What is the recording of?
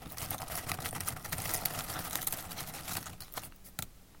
Short potpourris rustling sound made by stirring a bowl of it
rustle, potpourris, scrunch, crackle, crunch